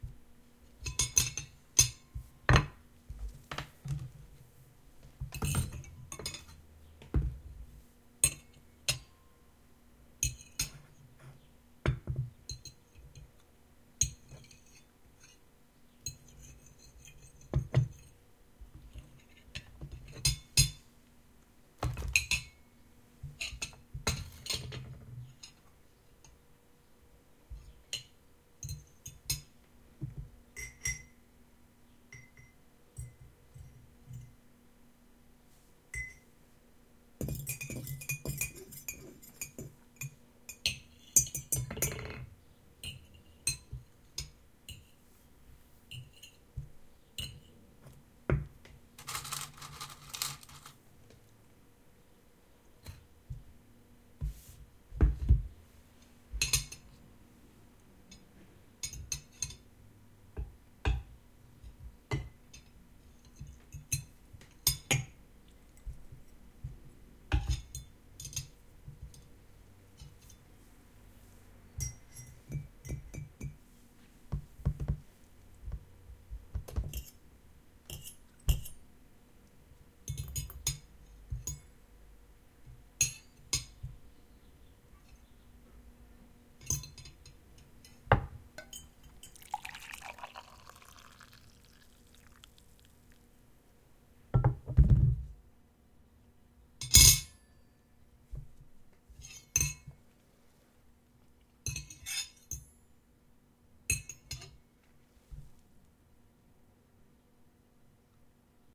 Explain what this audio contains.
Sound of people eating dinner, a few seconds in a drink is poured. Great paired with Walla Walla for restaurant ambience or two people eating dinner.
bar, clinking, date, Dinner, dishes, drink, drinking, eating, pouring, pub, restaurant